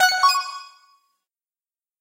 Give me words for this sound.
GUI Sound Effects